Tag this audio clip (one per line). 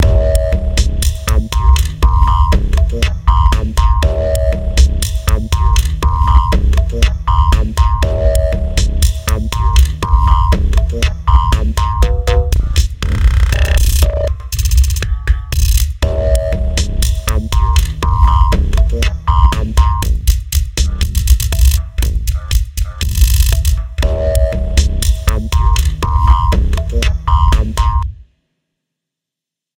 Drum
dance
Lofi
EDM
Cinematic
Sample